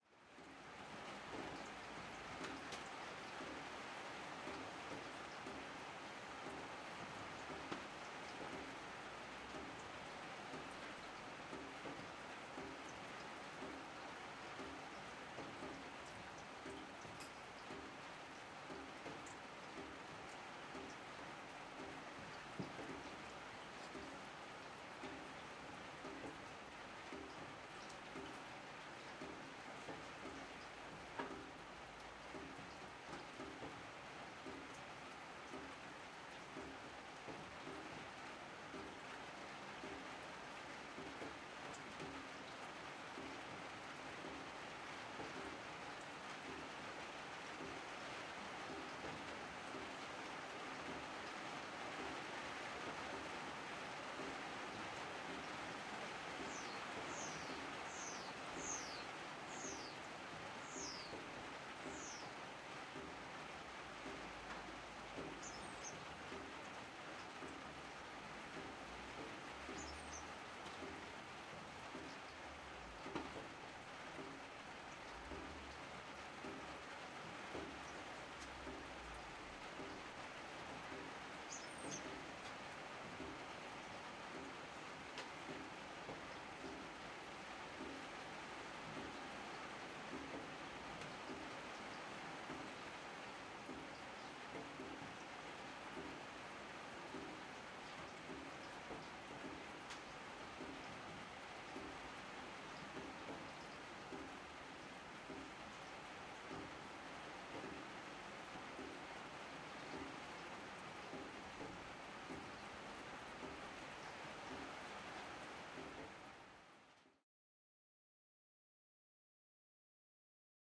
Raining under roof was recorded with two mics (lavier and boom). The second mic is another track ("raining under roof 2").
atmospehere, rain, raindrops, roof, weather